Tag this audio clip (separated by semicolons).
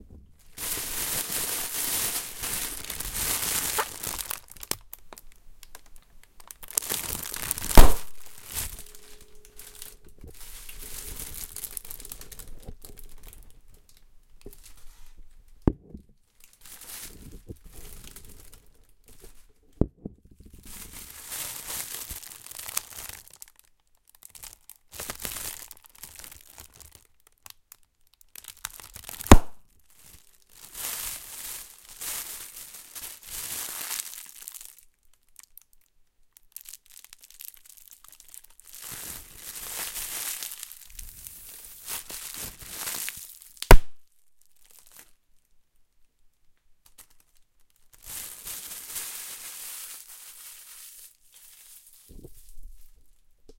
plastic; plastic-packing-bubble; air-pop; bag; wrapping; pop; large-air-pocket; wrap; crackle